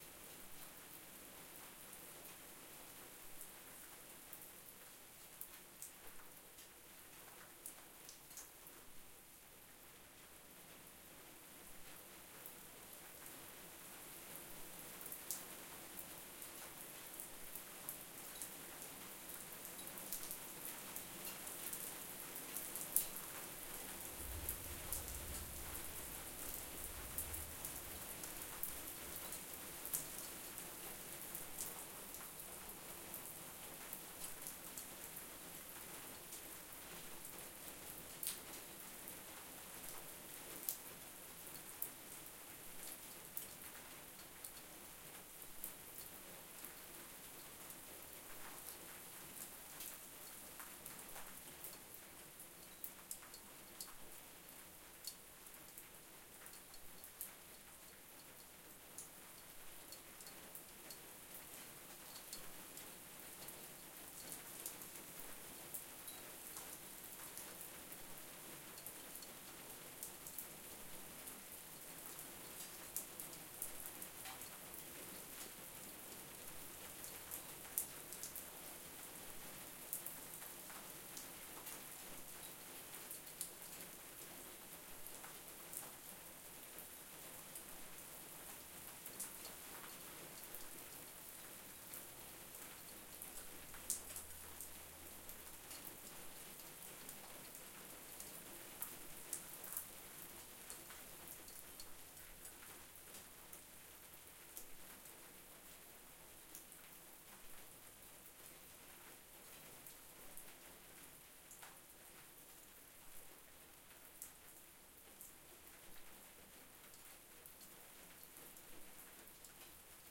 NM-Mejor-Lado-Rain-01
field-recording, Mexico, New, Rain, soft